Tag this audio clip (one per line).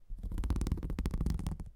Horror
material
Scary
SFX
Sound-Design